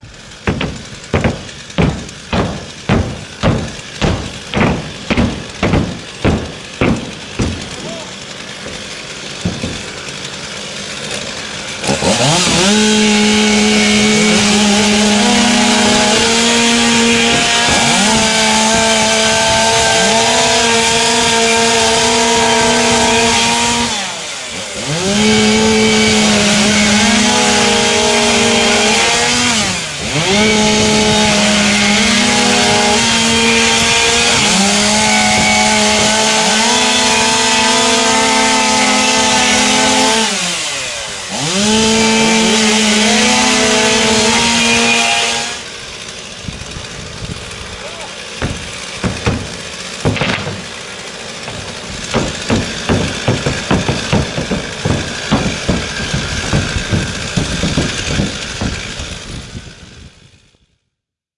RRMX FLAT CUT 2A
this was a compilation of sounds from personal files that i recorded using a ZOOM R16 with onboard condensers and multiple mics: 2-57's,58,AT2020 ..each channel was molded by various eq'ing techniques, stereo spectral expanders and finally compressed.
away, breath, cut, fire, hole